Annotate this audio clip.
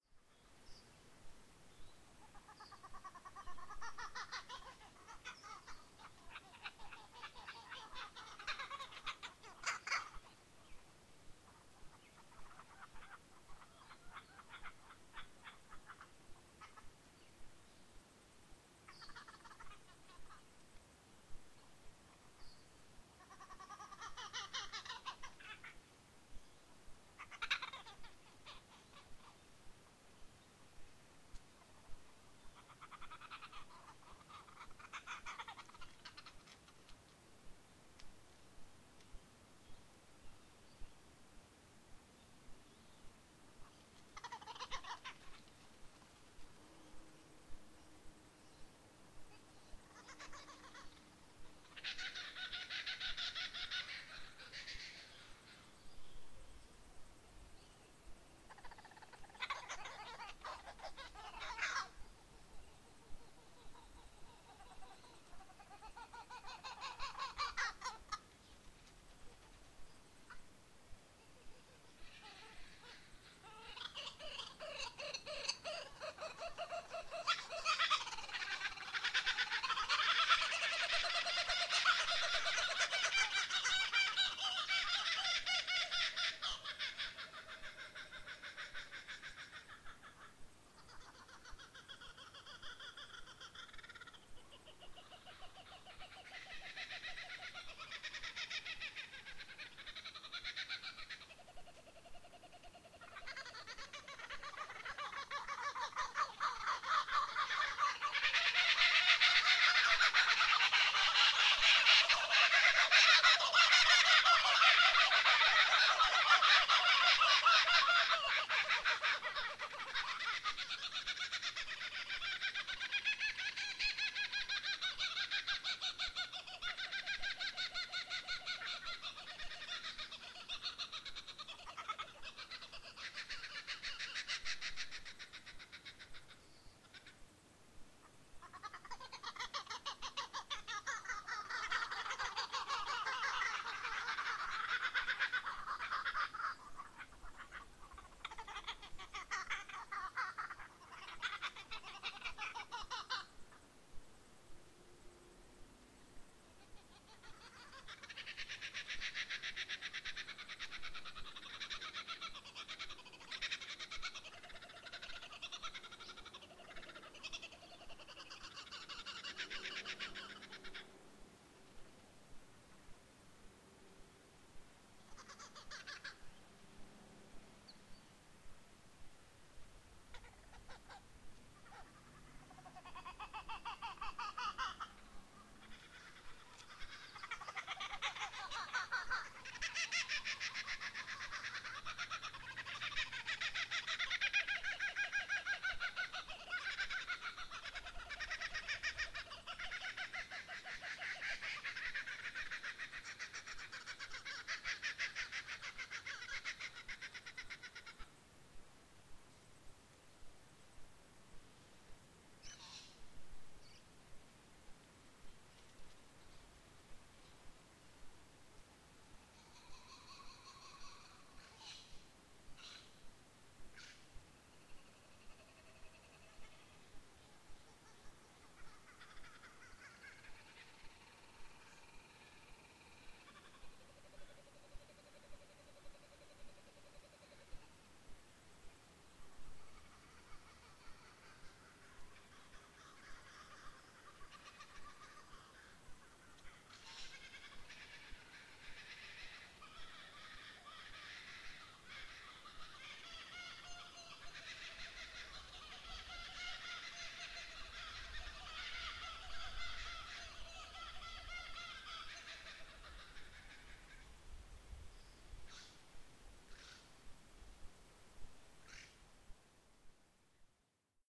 about 8-10 kookaburras all upset over something.
This is a lot of kookaburras in one spot. Don't think ive ever seen so many all together.
I think they're squabbling over some kind of food in a tree. Grubs or something.